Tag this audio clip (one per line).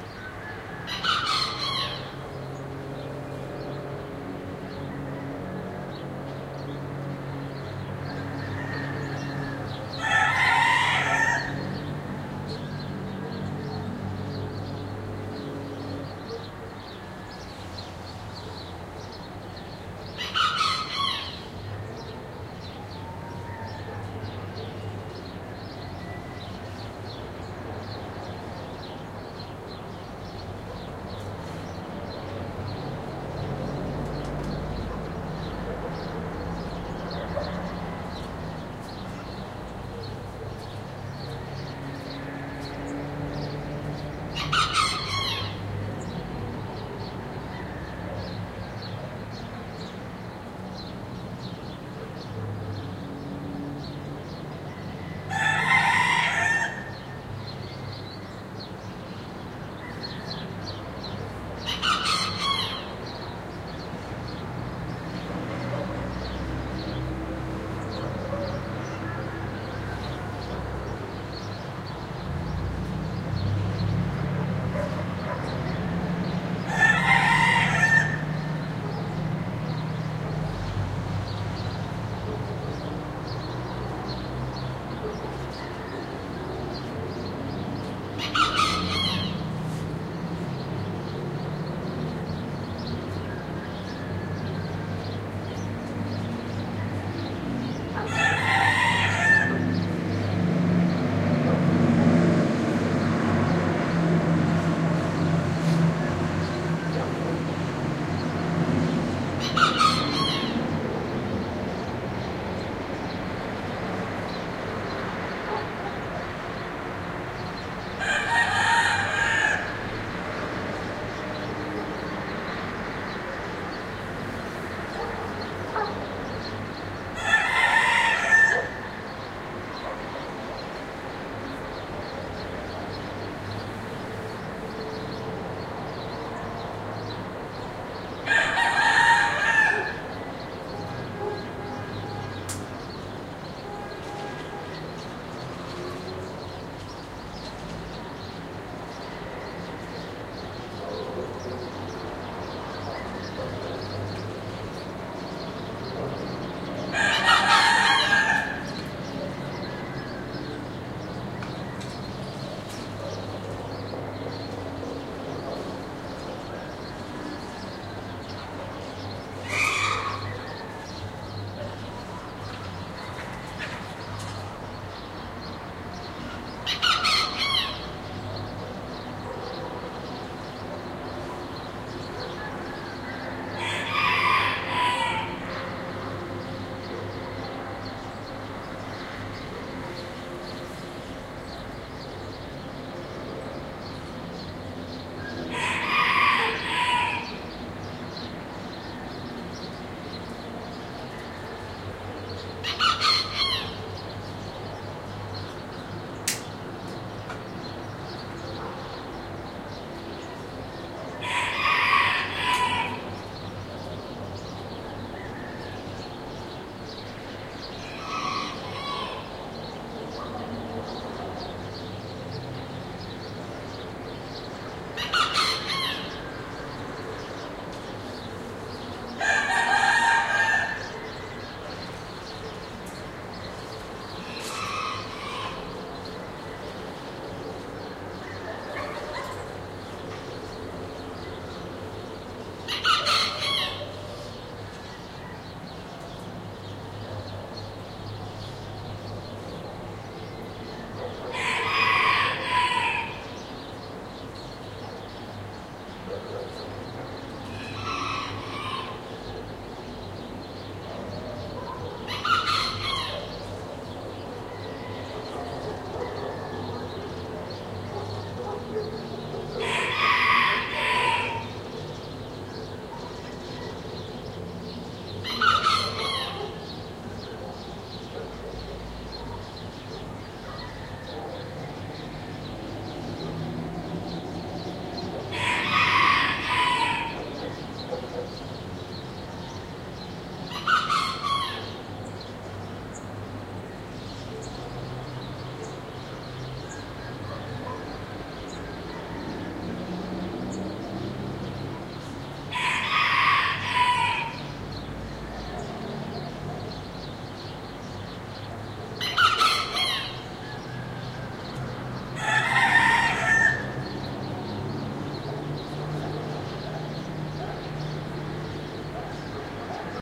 Cock-a-doodle-doo
Mexico
ambiance
cockerel
field-recording
rooster